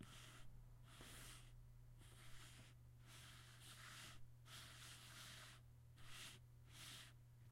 SLIDING MOUSE 1-2
computer, mouse